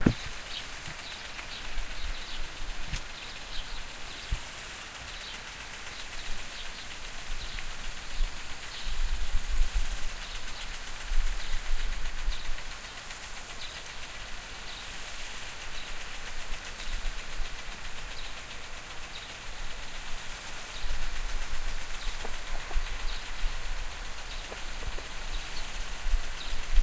This sound was recorded with an Olympus WS-550M and it's the sound of sprinklers operating in an area with grass in the industrial polygon.
grass, sprinklers, water